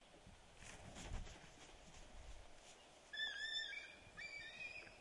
baldeagle-flap and cry
Not a very good recording, and very short - but this is the flapping of a juvenile bald eagle's wings and its cry. I didn't manage to get a good shot at this one, so that's all there is. But I'll try for a better sample as soon as I can. This is a real bald eagle - not a red-tailed hawk, which is what most eagle samples really are.